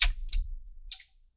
Tortillini Splash 2
A collection of 4 sounds of me...well...playing with my tortellini! That didn't come out right. Anyway...They'll make great splat and horror sounds, among other things! Enjoy. :)
squish, horror, blood, flesh, horror-fx, limbs, horror-effects, splat, squelch